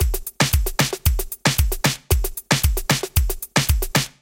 Reggaeton / soca style beat.
114bpm, beat, break, breakbeat, drum, loop, reggae, reggaeton, soca